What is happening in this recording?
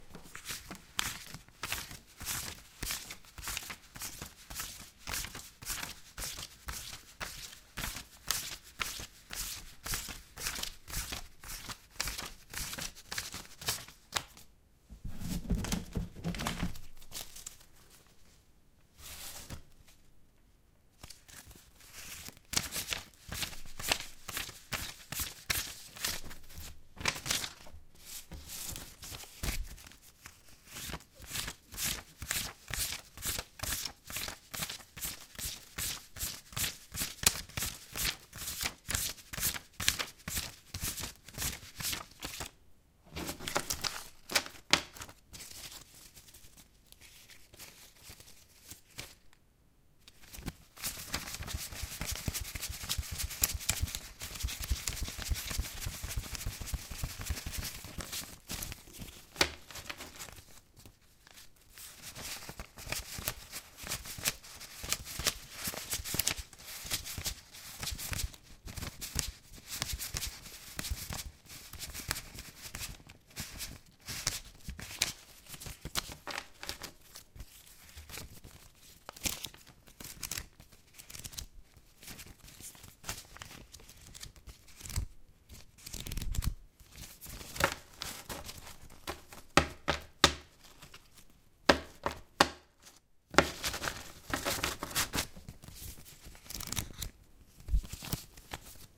Counting Bills
Counting some bills of money.
Recorded with a Zoom H2. Edited with Audacity.
Plaintext:
HTML:
finances, count, cash, trade, counting, accountant, currecy, asmr, payout, bills, dollars, payment, money, euro, dollar, cashier, bank, paper, pay, eur, finance, billing, transaction